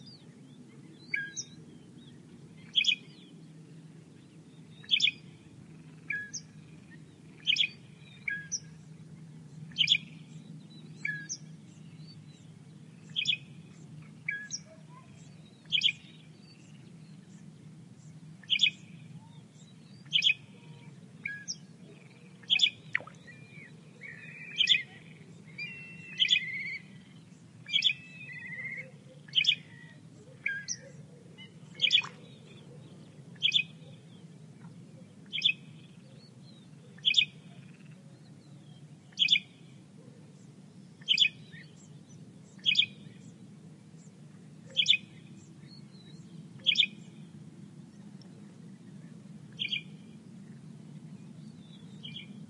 20060326.marshes.shrike.kite
a Woodchat Shrike (Lanius senator) came to perch in a wire just above the mic, a Black Kite (Milvus migrans) passes by, some frogs croak. Rode NT4-FelMicbooster-iRiverH120(rockbox)/ un alcaudón que vino a posarse en un alambre justo sobre el microfono, un milano que pasa, y algunas ranas